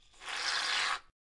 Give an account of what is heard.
shaving cream
cream, shaving